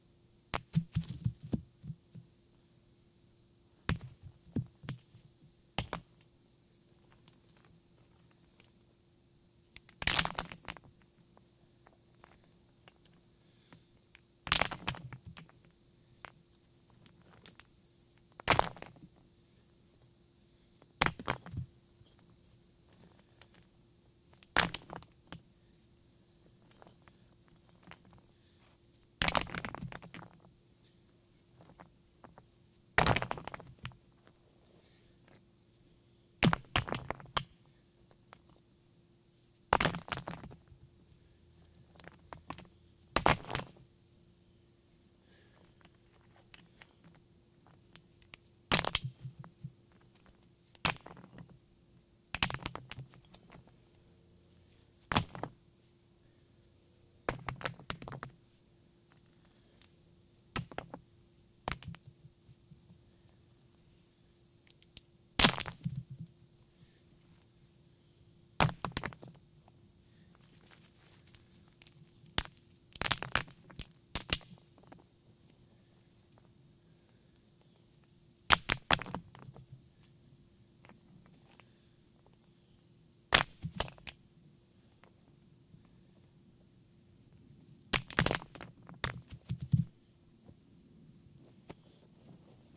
Repeatedly picking up small rocks and dropping them on a rock pile.
wheelbarrow, rocks, gravel